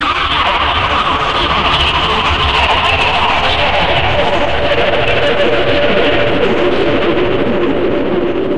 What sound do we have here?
104114 mikobuntu distguitar-2
A remix of this work. A guitar on computer
distortion, guitar, heavy-metal